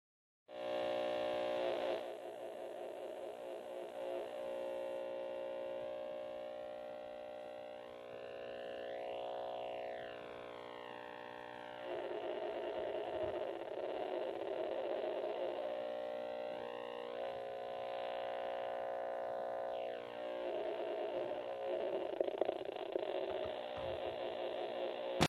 60hz glitch
A 60hz hum from my living solutions 5.5'' TV receiving a very low voltage, which caused the TV to hum like crazy. Recorded with my cell phone
noise, analog, glitch, electronic, current